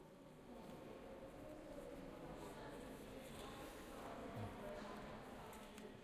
atrio serralves
Sound of the atrium in an exposition.
This recording was made with a zoon H2 and a binaural microphone in Fundação de Serralves on Oporto
binaural, exposition, Field-recording, public-space